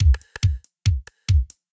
Groovebox MC-505 beat 3
this sound is made by first programming the beat on the Groovebox MC-505, then tunring the knobs while it player